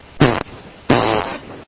fart poot gas flatulence flatulation explosion noise weird
gas, noise, fart, explosion, weird, flatulence, poot, flatulation